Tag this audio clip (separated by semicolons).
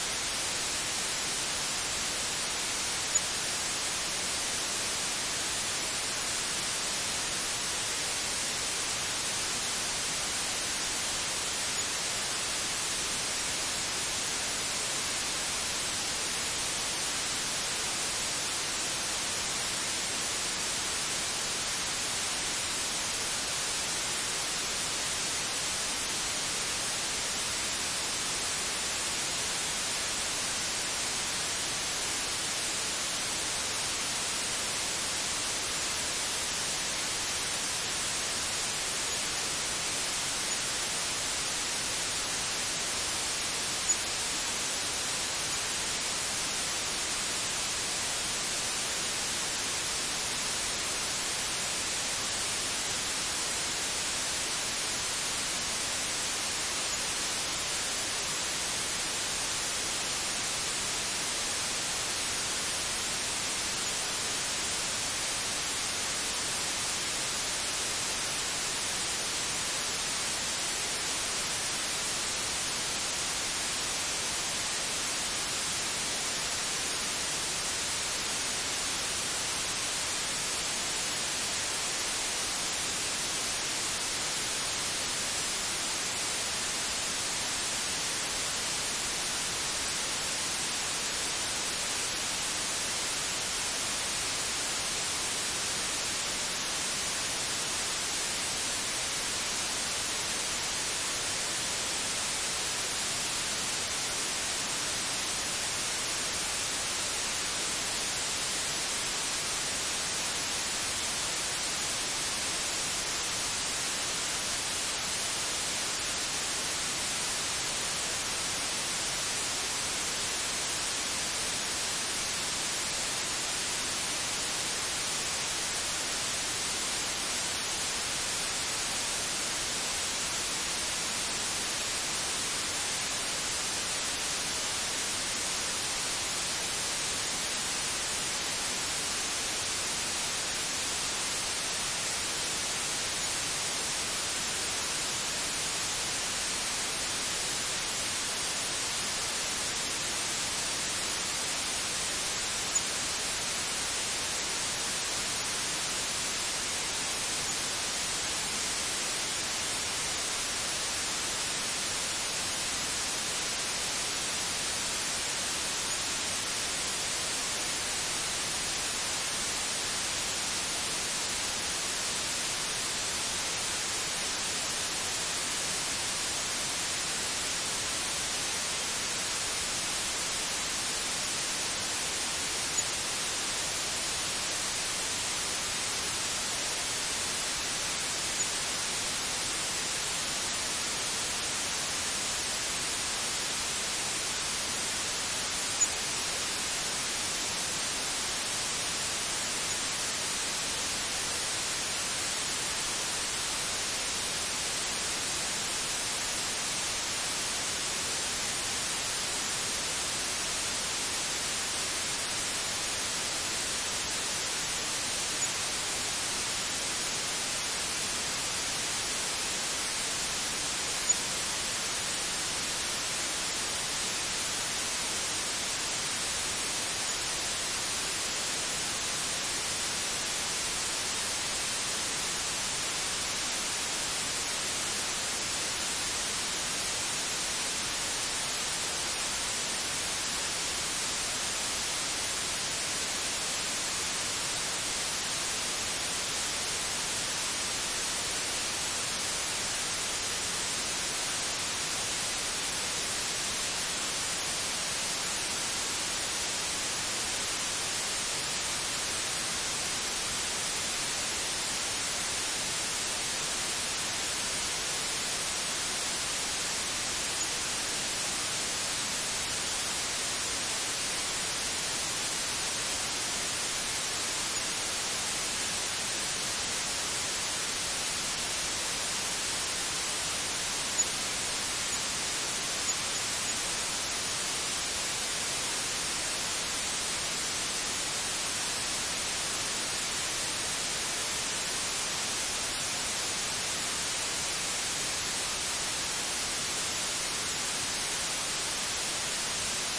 band-limited; velvet-noise; white-noise